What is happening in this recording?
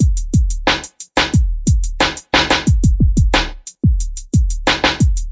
beat4 90BPM
instrumental, radio, loop, music, beat, disco, drop, trailer, part, pbm, stereo, pattern, jingle, background, broadcast, club, interlude, intro, move, podcast, sound, dancing, stabs, dance, mix, hip-hop, rap, sample, chord